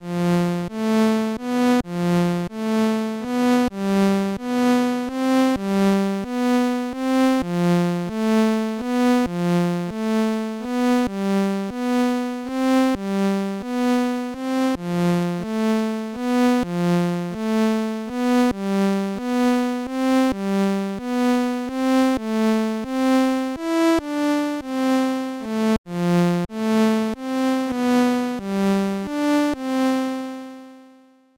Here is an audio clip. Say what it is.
This is a little piece I created in FL studio after messing around with the stock synth plug-in. I felt as though someone can use it for a multitude of reasons.